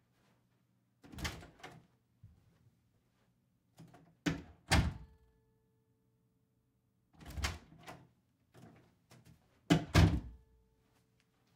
Door Open & Close 2 (Off-Axis)
Recording of a door being opened and closed, and then opened and closed again. Very slight footsteps (on carpet) can be heard. The microphone (Sennheiser MKH-50) was intentionally placed about 5 feet away from the door and aimed slightly off-axis so as to capture more of the natural room sound/reverb. I have another recording available of the exact same door and mic setup, but aimed on-axis for a more direct recording (it still has a slight bit of room sound - that was what I wanted for these two recordings).
Recorded into a Tascam 208i audio interface and into Adobe Audition. Very minor processing (low-cut at 80Hz to remove low-freq rumble).
foley, door, open, home, interior, carpet, reverb, space, close, clean, office, repeat, room, slam, shut